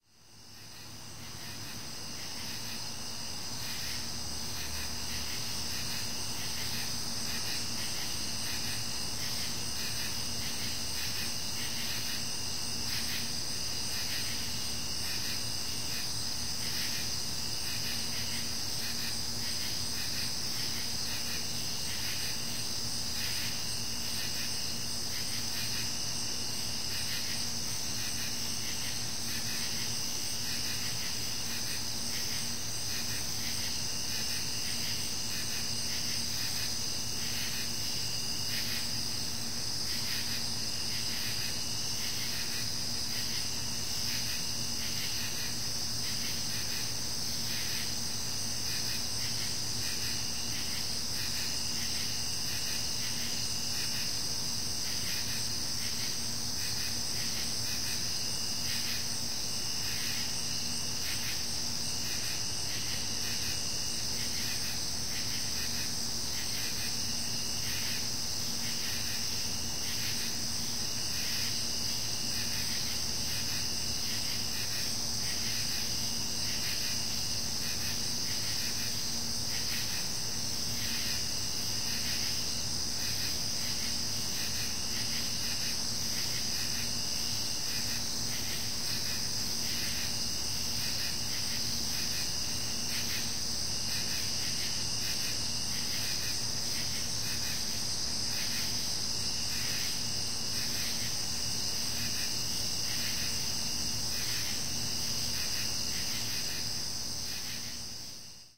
What do you do if you enjoy recording the natural sounds of our planet and you can't sleep at 2:00 in the morning on a hot August evening?
You go out and record the insect chorus of course!
Recording made with the Zoom H4N and the internal microphones.
field-recording, insects, peaceful, sound-scape, summer